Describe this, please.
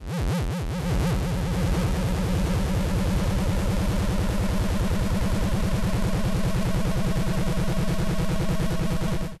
Thank you for the effort.